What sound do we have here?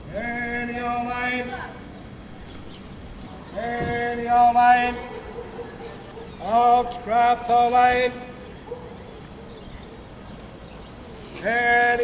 old iron2 part1
rag bone mane plying his trade
bone, lutterworth, man, old, rag